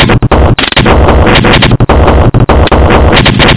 Loop-Glitch#04
bent, break, fast, glitch, glitchcore, loop